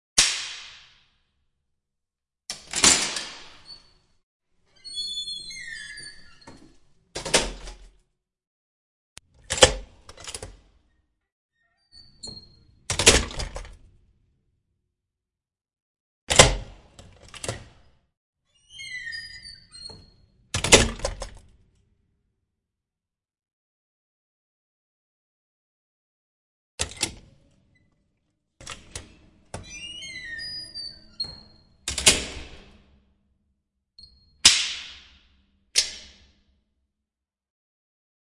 door metal heavy push bar beep open close with security deadbolt unlock click1
beep, click, close, deadbolt, door, heavy, metal, open